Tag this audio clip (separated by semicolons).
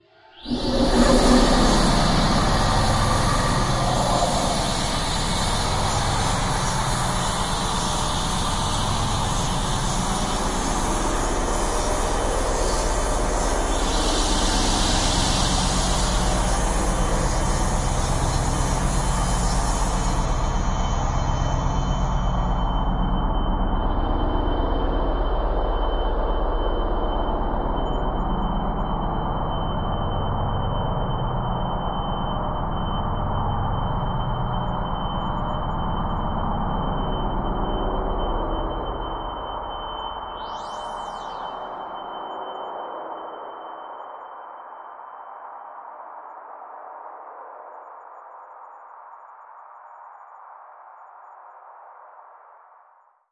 artificial; drone; multisample; pad; soundscape